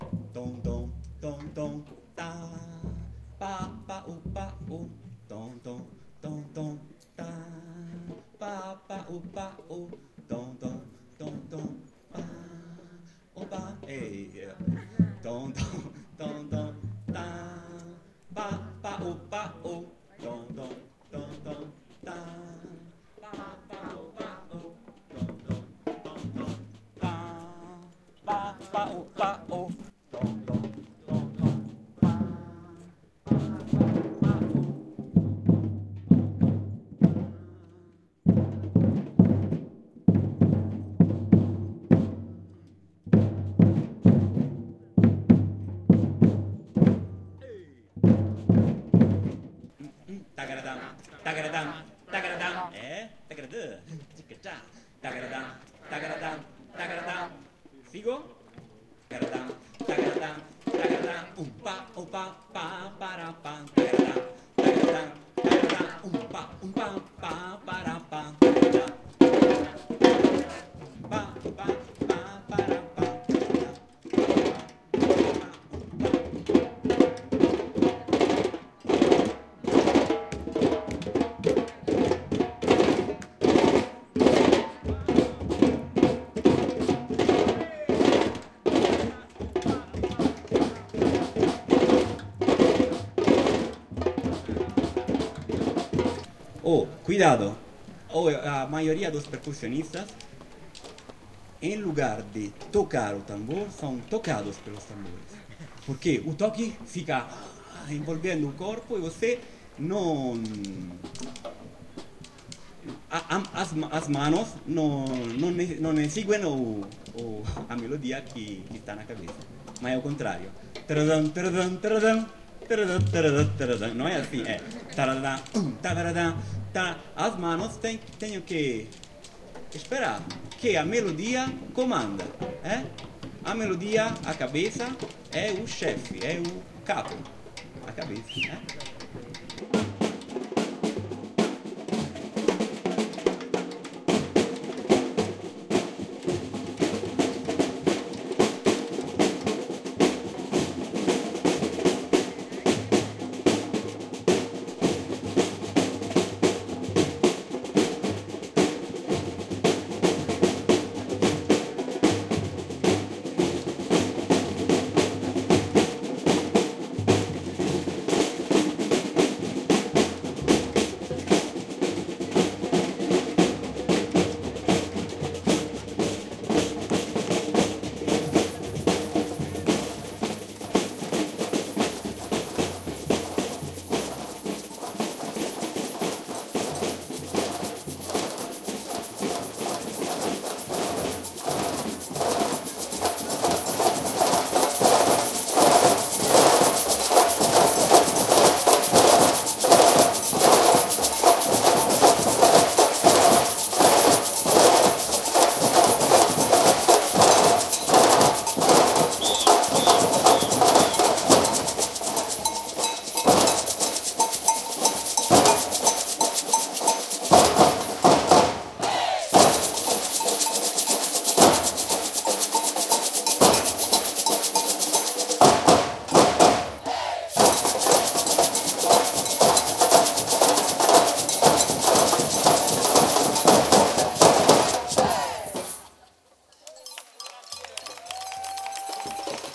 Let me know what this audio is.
Arreglos para batería de Samba :: Arrangements for samba drums
Fragmentos del taller "Arreglos especiales para batería de samba" de Giuliano Lucarini, en el encuentro de batucadas del festival GreDrums en Casavieja.
Sonido de tambores y de voces intentando llevar un ritmo, comentarios del profesor.
Fragments of the workshop "Special arrangements for samba drums" by Giuliano Lucarini, at the meeting of batucadas within GreDrums festival in Casavieja.
Sound of drums and voices trying to keep rhythm, teacher comments.
Microphones: Sennheiser MKE 400 + ZOOM H2
batucada, Casavieja, drums, instrumentos, percussion, samba, Spain, tambores